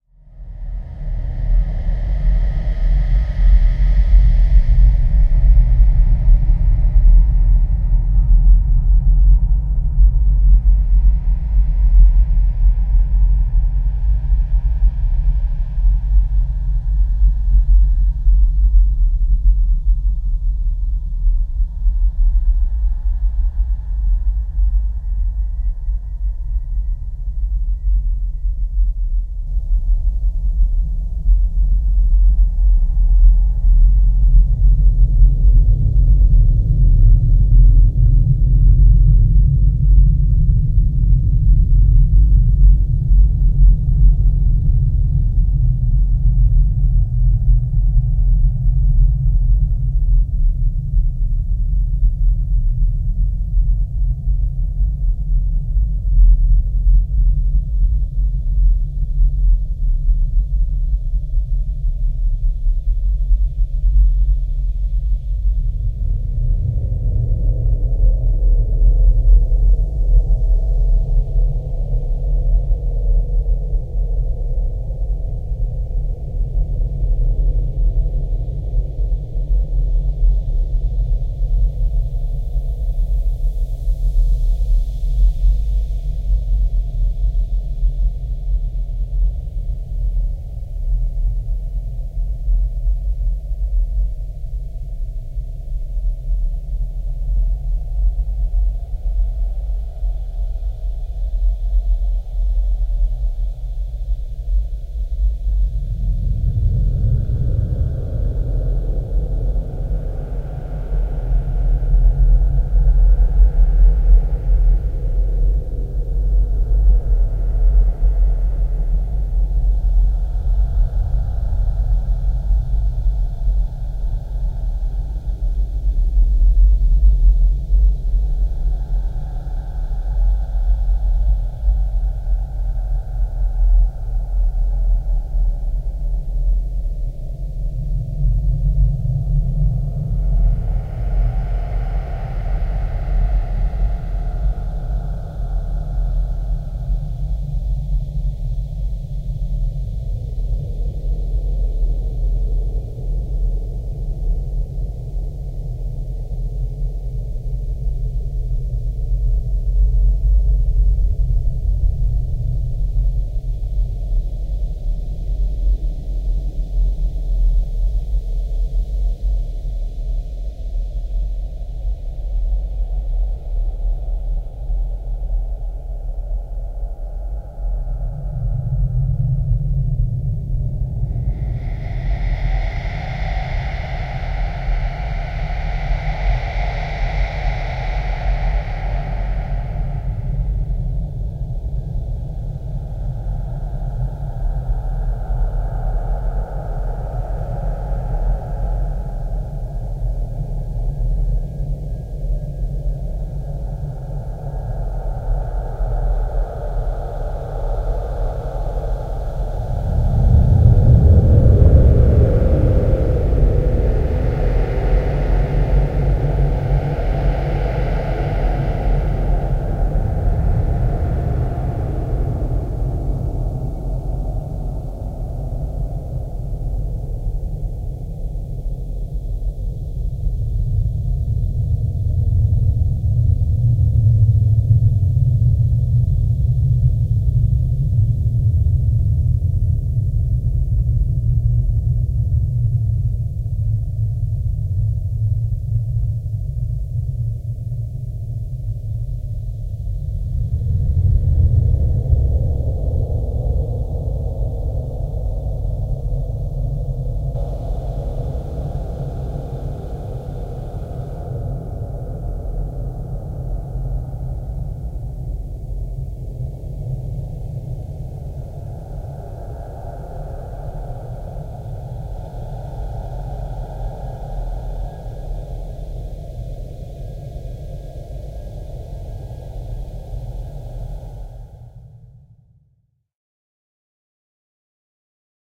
Rumble - (Drone 2)
An odd rumbling drone to add some eerie vibes to you're project. Enjoy
This sound or sounds was created through the help of VST's, time shifting, parametric EQ, cutting, sampling, layering and many other methods of sound manipulation.
Any amount donated is greatly appreciated and words can't show how much I appreciate you. Thank you for reading.
๐Ÿ…ต๐Ÿ† ๐Ÿ…ด๐Ÿ…ด๐Ÿ†‚๐Ÿ…พ๐Ÿ†„๐Ÿ…ฝ๐Ÿ…ณ.๐Ÿ…พ๐Ÿ† ๐Ÿ…ถ
thrill, Thriller, zombie, paranormal, scared, Suspense, Horror, zombies, Ghost, Scary, Drone